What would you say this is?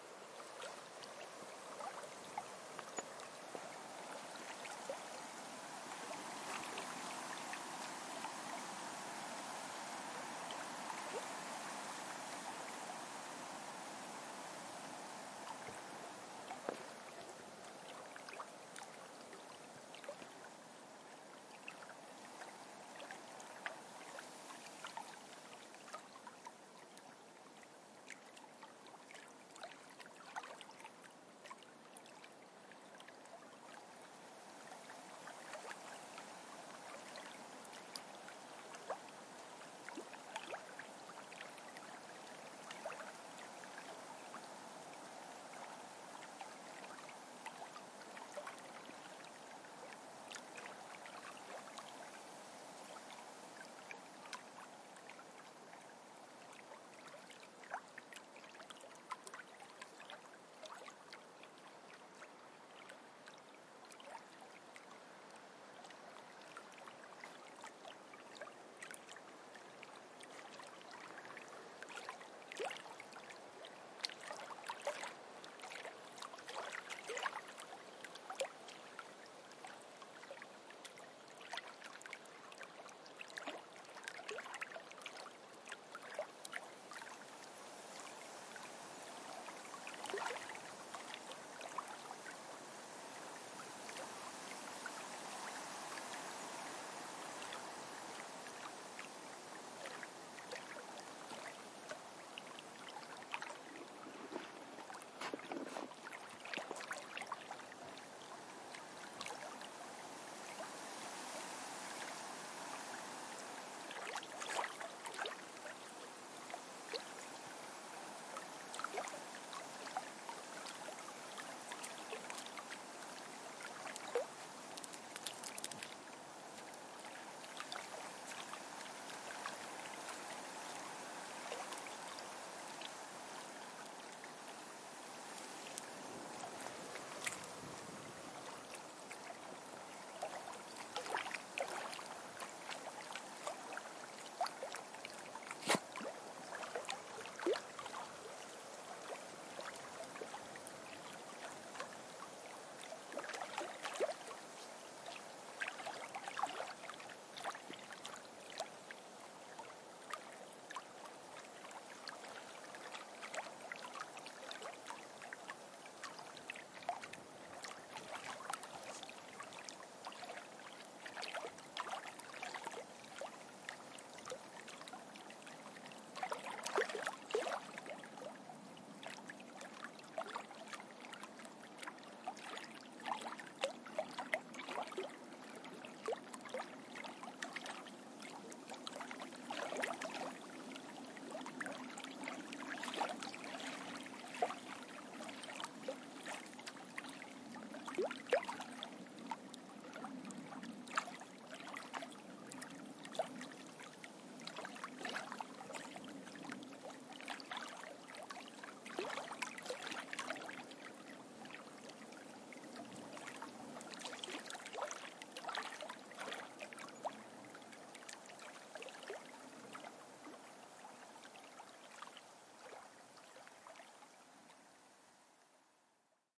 Waves at the beach cliff 1
Standing on the beach to the lake in October with my Zoom h4n pro and recording the sound of the wind and waves.
field-recording, waves, Zoom, Oskarshamn, wave, cliff, beach, Sweden, water, wind, shore, H, lake, h4n, Wavelab, rain, nature, arna, llesj, sea, pro